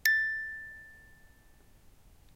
one-shot music box tone, recorded by ZOOM H2, separated and normalized